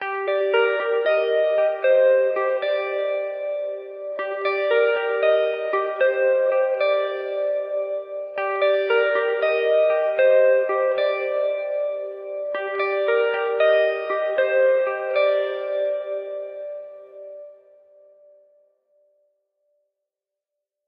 plucked TheCureish2
This one is the same as the first Cure-ish loop, yet not quite so processed. The Vox-like amp with just a little bit of phaser and some reverb. Might be Depeche Mode-ish, then? 115 bpm.
chord, guitar, loop, melodic, music, phaser, plucked